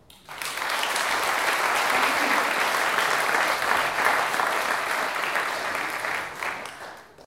Medium Crowd Clapping 1
A medium sized crowd clapping for a speaker who just presented. Recorded on the Zoom H4n at a small distance from the crowd.
Location: TU Delft Sports & Culture Theater, Delft, The Netherlands
Check out the pack for similar applauding sounds.
crowd, group